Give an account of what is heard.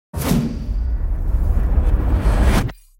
Dark Magic Cast Loop Short
Cast, Magic, Short, Loop, Dark